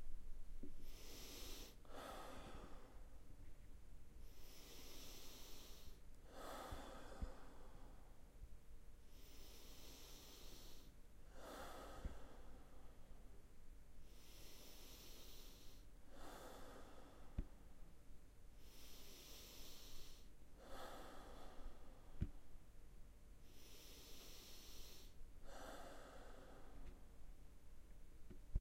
Breathing, calm, mouth exhale
Recording of calm breathing, during which exhalation happens through the oral orifice. Lol.